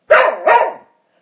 Dog Double Bark
I recorded my dog barking after I hit a single note on my piano. Recorded using my ipad microphone, sorry for the lack of proper recording. I figured I would just nab it while he was feeling talkative!
barking, pooch, whine, yip